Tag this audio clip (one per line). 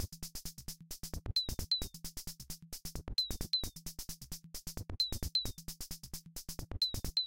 techno
electronic
funky
rhythmic